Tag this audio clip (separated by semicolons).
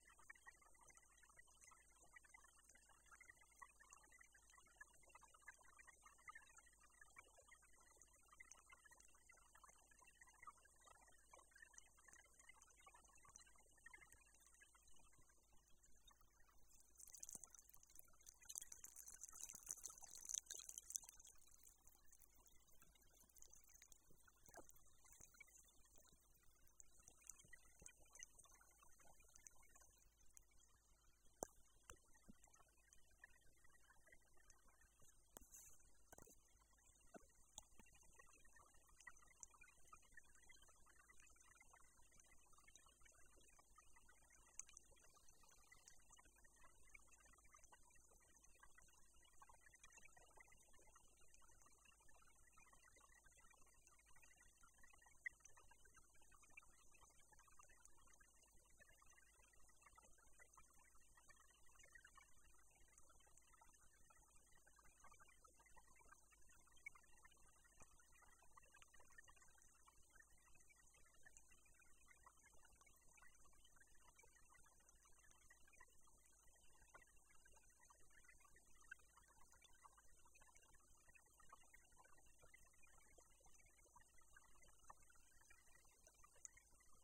river; relaxing; foley; ocean; effects; ambience; field-recording; nature; sound; ambient; water; stream